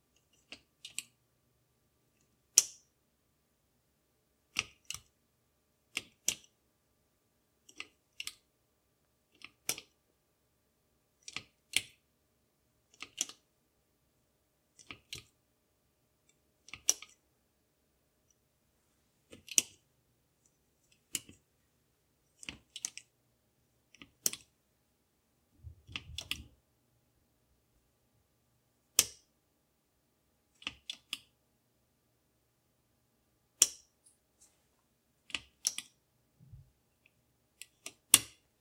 Latch Clicks
Opening/closing the metal latch on a small glass jar.
clack, click, container, glass, hit, jar, latch, mechanical, metal, small